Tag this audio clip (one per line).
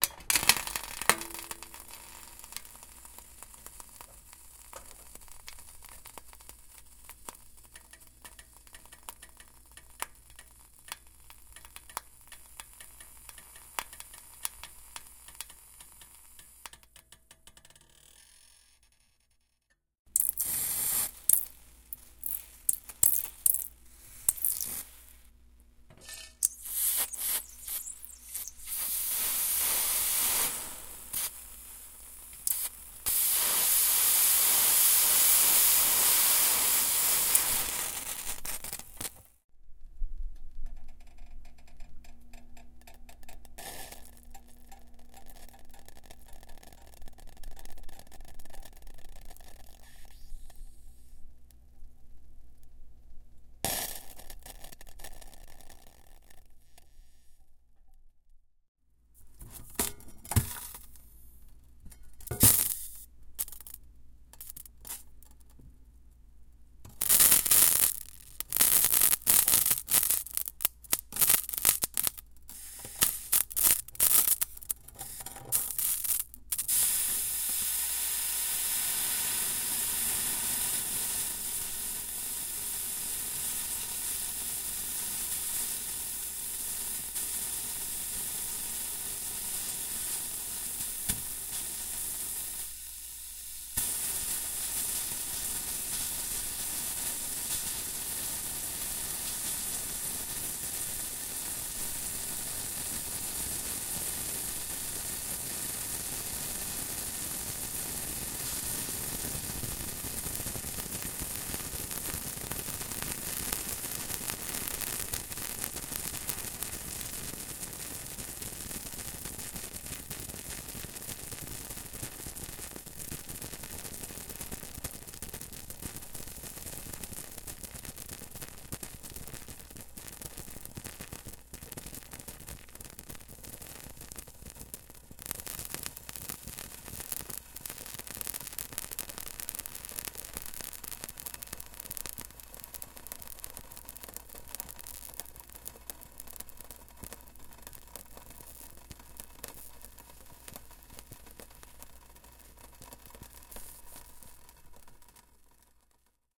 hiss
Water
heat
sizzle
steam